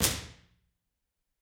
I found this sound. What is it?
Studio B Right
Impulse response of Studio B at Middle Tennessee State University. There are 4 impulses of this room in this pack, with various microphone positions for alternate directional cues.
Ambience, Response, Impulse, Reverb, IR, Room